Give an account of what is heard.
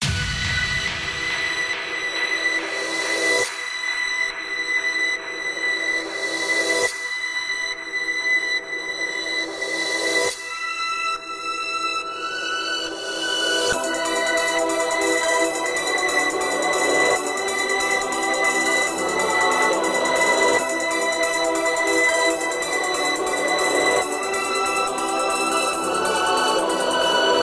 Jam X Yaboii Intro 1&2
Pop, ambient